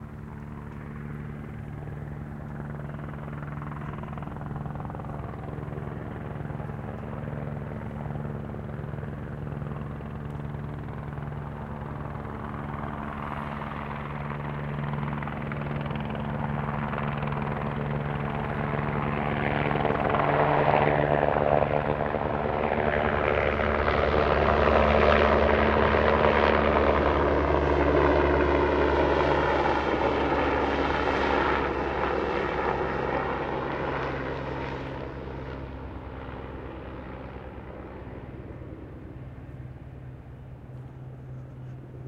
Vehicle Helicopter Flyby Close Stereo
Helicopter Flyby - Close (~1km to close to my house).
Gear: Rode NT4.
helicopter, close, rotor, fly, flight, military, flying, nt4, rode, flyby, ambiance, vehicle, heli